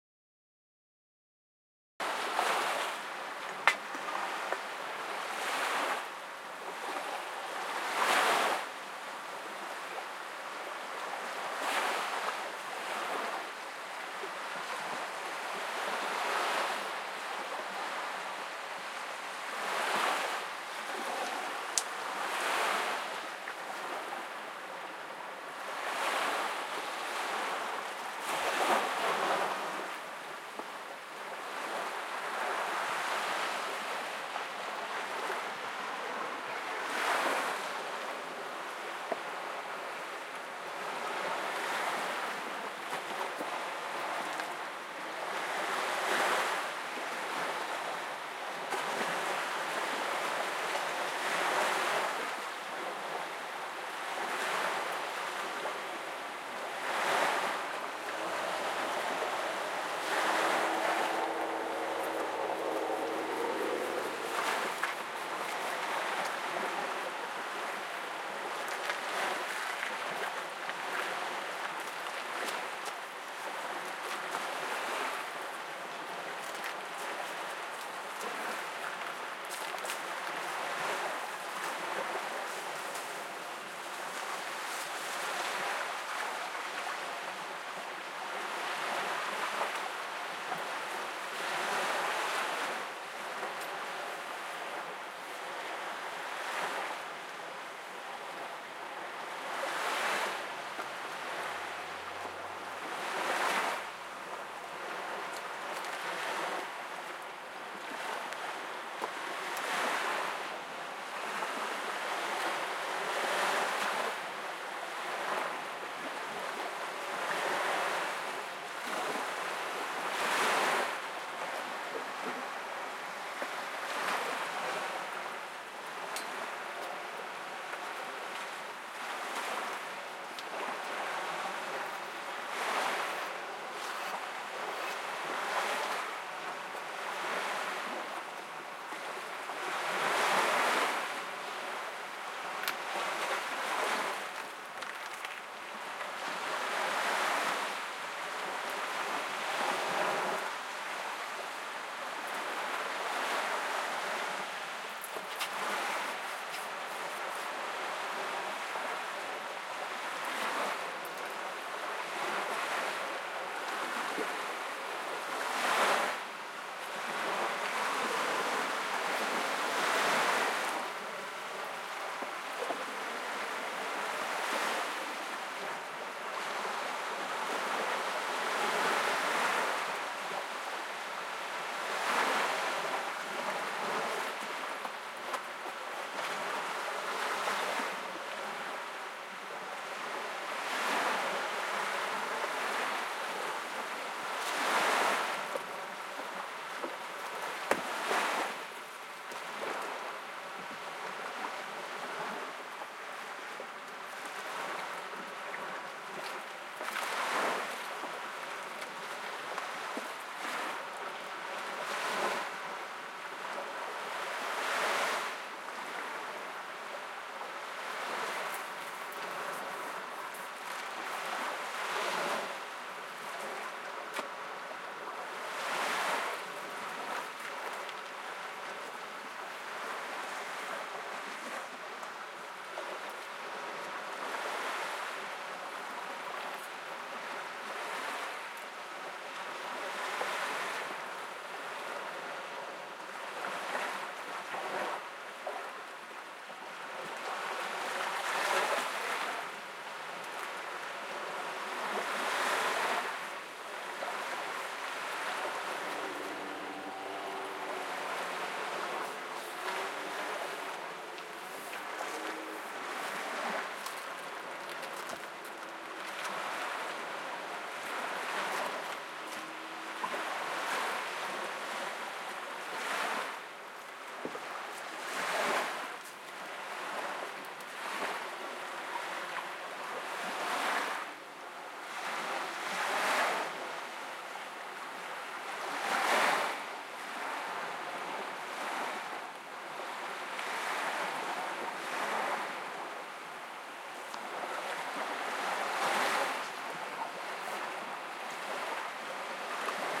Recorded at Gandía`s beach, Valencia, Spain. Calm waves, and subtle sounds of people walking along the shore.